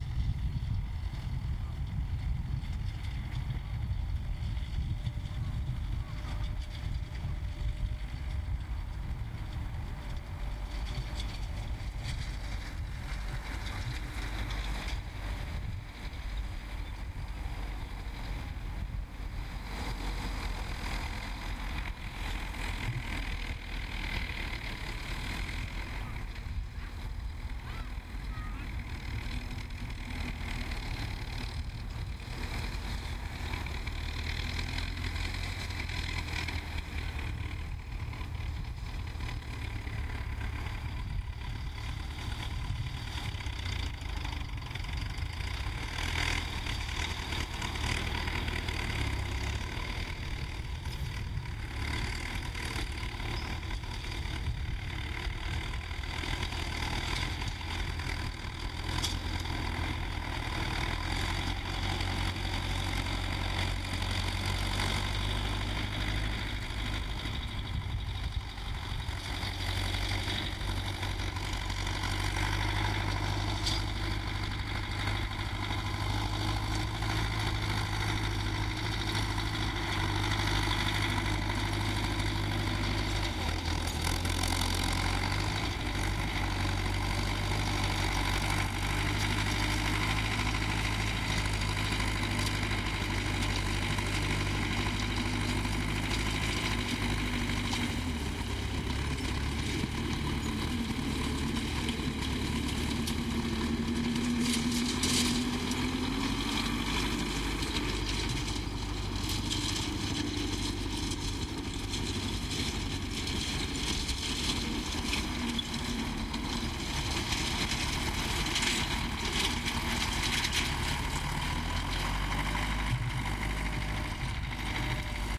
Tractor digging
Gamarjveba is a Georgian village, where I grew up. Literal translation of the village means “To Win”.
I recorded this sound in 2015. Went to fields where we used to have lot’s of apricot trees. In a distance you can hear tractor ploughing.
Gear: H2N
25/04/2015
ambience, dig, field-recording, noise, tractor, village